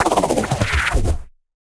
This sound was created by processing my own footsteps with a combination of stuttered feedback delay, filter modulation (notched bandpass + lowpass LFO), and distortion (noise carrier + bit crushing).